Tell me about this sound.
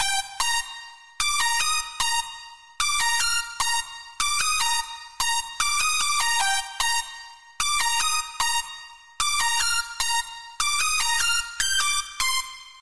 Pluck Lead 150BPM Bm
A Pluck lead ideal for Future Bass.
Pluck, Bass, Future, Lead